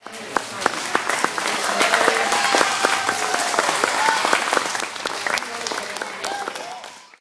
Recorded at a kids soccer match, a crowd cheering in the gymnasium for the coaches. Recorded with an iPhone using Voice Memos